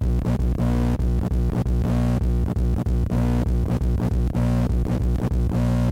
a basic low glitch rhythm/melody from a circuit bent tape recorder
bent, circuitbending, glitch, electricity, noise, electronic, power, lofi, bass, hum